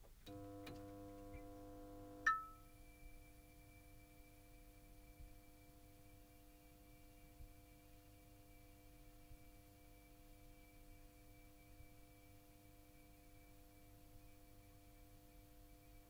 A fluorescent light being turned on. Recorded in my parent's garage. I used a Zoom H4n with a RØDE NT1000. This is the NT1000 clean channel. I'm sorry about the noise.